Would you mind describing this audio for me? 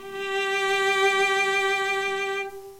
A real cello playing the note, G4 (4th octave on a keyboard). Eighth note in a C chromatic scale. All notes in the scale are available in this pack. Notes played by a real cello can be used in editing software to make your own music.
There are some rattles and background noise. I'm still trying to work out how to get the best recording sound quality.